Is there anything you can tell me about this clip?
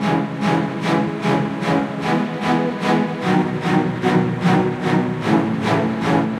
dragontrance string-loop-1b
150-bpm, ambient, loop, string, loops